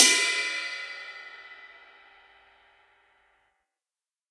Ottaviano22TurkRideCymbal2705gBell
Ottaviano ride cymbal sampled using stereo PZM overhead mics. The bow and wash samples are meant to be layered to provide different velocity strokes.